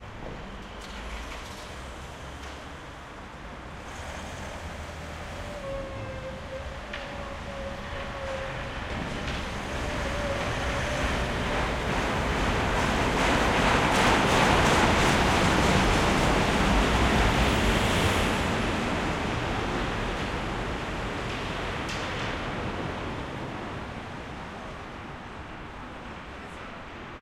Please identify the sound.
12 atmo-wuppertal-schwebebahn
Wuppertal Schwebebahn monorail pull away.
traffic, Wuppertal, urban, Schwebebahn, city, ambience